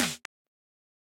this series is done through layering and processing many samples of drum sounds i synthesised using various plugins namely xoxo's vst's and zynaddsubfx mixed with some old hardware samples i made a long time ago. there are 4 packs of the same series : PERC SNARE KICK and HATS all using the same process.